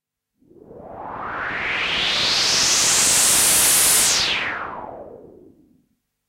A cool white noise sweep made with Arp Odyssey analog synth.